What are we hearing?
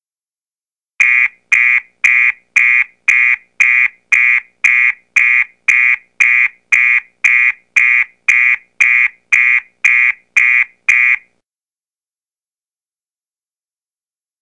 Here's the next siren sound. An intermittent horn. Enjoy.